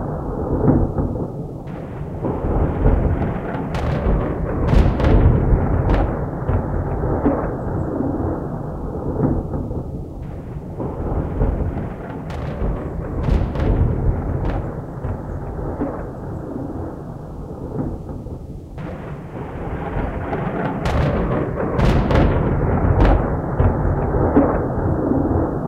This sample has been process so that it will loop seamlessly for an 'infinite storm'. It does sound a little like jets are flying low overhead and bombing...
remix, storm, explosion, bombing, loop, war, loud, massive, bomb, thunder, rumble